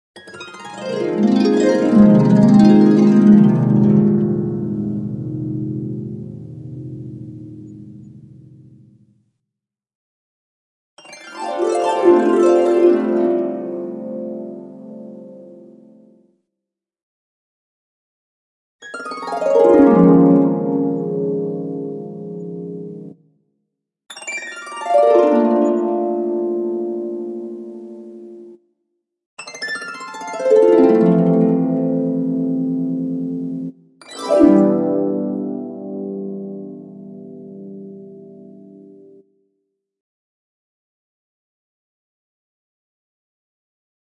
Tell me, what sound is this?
HARP GLISSANDO DOWN
Different harp glissandos played by using Reason orchestral soundbank
glissando, harp, music